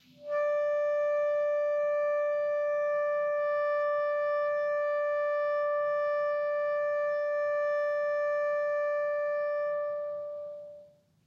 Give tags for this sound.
multisample clarinet single-note d5

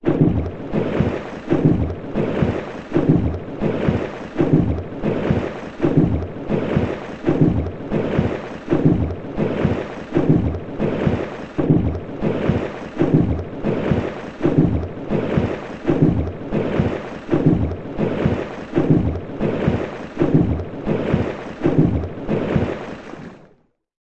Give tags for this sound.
pumping pump water-pump

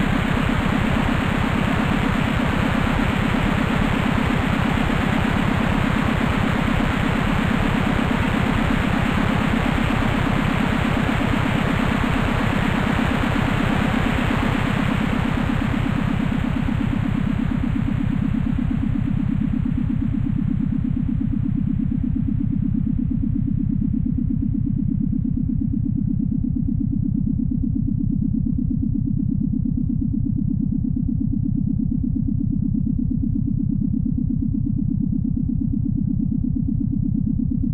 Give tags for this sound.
noise space eletric 2 engine

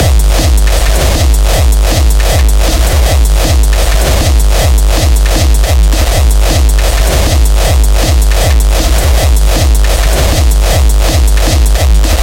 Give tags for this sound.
Sample Distortion Hard Drum Industrial Hardcore Kick

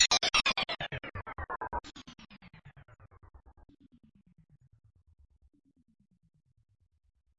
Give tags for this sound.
effect gameaudio sound-design

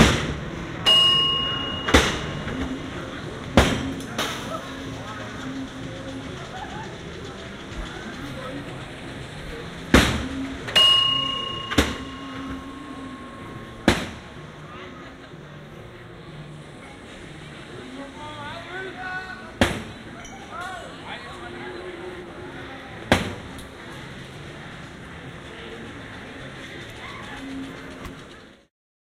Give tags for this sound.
ambience amusement park test-your-strength

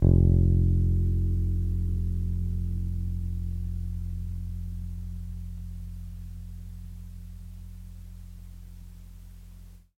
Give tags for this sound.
Jordan-Mills bass collab-2 lo-fi lofi mojomills tape vintage

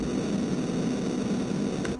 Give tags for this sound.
8 bit classic game sounds

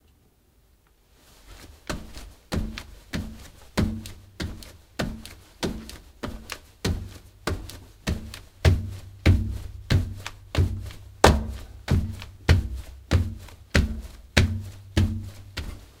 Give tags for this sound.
floor no feet hard jumping barefoot shoes jump

